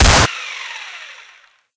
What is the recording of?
M134 automatic Heavy fire gun.
recorded from A soldier in iraq